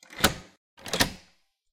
double door lock
Sound of a door lock, dead bolt, latch or tumbler. Door being locked from the outside.
close, unlock, foley, latch, door, bolt, locking, lockup, lock-up, tumbler, lock